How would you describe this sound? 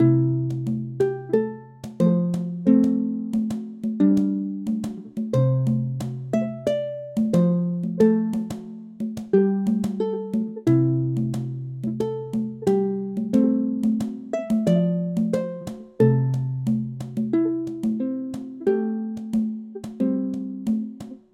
Groove Music
A cute little piece of looping music. Made with Protools, using the XPand2 synthesizer. The music is played at 90bpm.